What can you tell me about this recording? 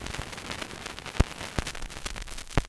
vinyl - in 03
The couple seconds of crackle before the music starts on an old vinyl record.
Recorded through USB into Audacity from a Sony PSLX300USB USB Stereo Turntable.
turntable, crackle, noise, noisy, vinyl, surface-noise, album, vintage, LP, record, vinyl-record, lofi, pop